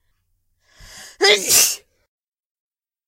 a single sneeze during recording
short, allergy, sneeze, real, achoo